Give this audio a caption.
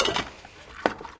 Doing the dishes